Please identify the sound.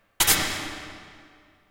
Pressure Plate Declick
click, effect, fx, pressure-plate, sfx, sound